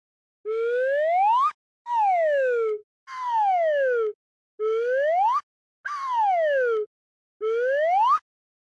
Cartoon Whistle
A whistle instrument recorded with an AT2020 through an Audient iD4 interface.